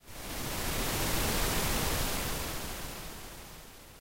GUERARD Karl 2012 13 son2
//////// Made using Audacity (only):
Generate a noise (amplitude : 0.4, time : 05sec)
Cross fade in
cross fade out
Apply delay ( 1,02 second)
change tempo to simulate wave sound (88% time 7,54 to 4,00)
//////// Typologie: Continue complexe (X)
////// Morphologie:
- Masse: Bruit seul
- timbre harmonique: pauvre, puissant et assez doux
- Grain: grain assez "gros" et rugueux tout étant coulant
- Allure: stable
- Attaque: l'attaque est graduelle, crescendo pour cause de fondu en ouverture
sound, Audacity, Noise